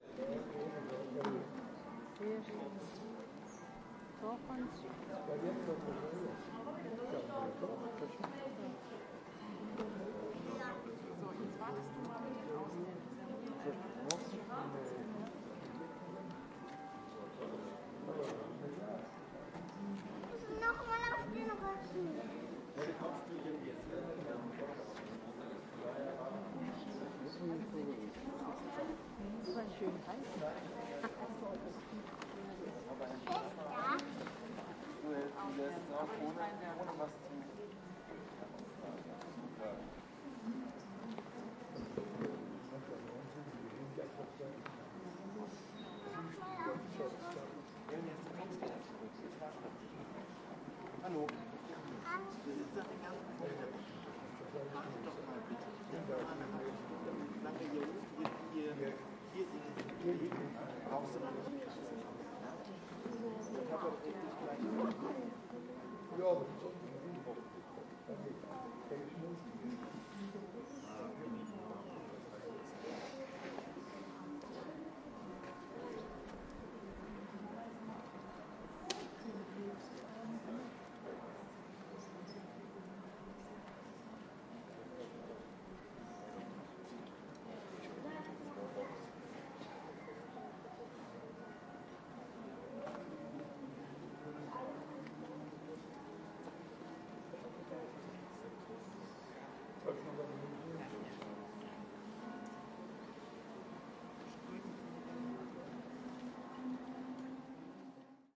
Town square or yard in front of church
Tourists in front of the church of the monastery Madonna del Sasso.
Recorded in Ticino (Tessin), Switzerland.